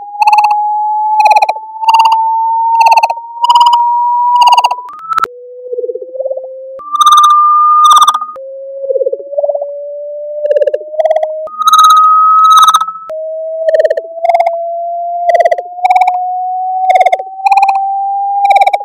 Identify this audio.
The second song is a whistle by introducing a whistle in a mono range. It join a height's progressive variation with a wahwah effect and a repeat. Then i tried to take some elevation by inserting the higher sound pieces where the sinusoidal sound variation was less variating.
This song aims to recreate the whistles of Aliens's Guns like in B Movies.